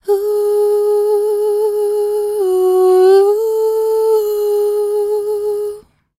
Katy Sings Melisma 2
Katy sings melisma style.